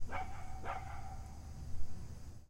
Dogs Barking in Distance Rural
Pembroke Welsh Corgi barking off in the distance near a forest. Bugs and birds in background.
bark, barking, barks, birds, distance, distant, dog, dogs, field-recording, nature